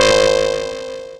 Sound effect made with VOPM. Suggested use - Shields up